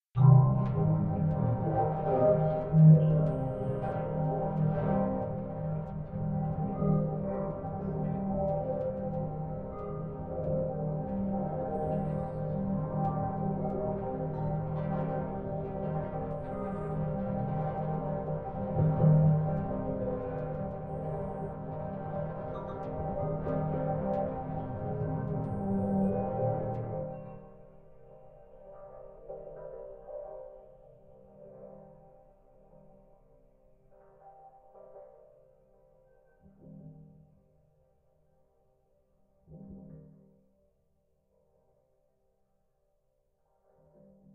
mega sample
lowercase minimalism quiet sounds
lowercase, minimalism, sounds